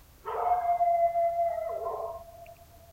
Dog howl 02
A dog howling in the distance at night. (Slightly spooky!)
woods, night-time, dog, distant, howl, night, creepy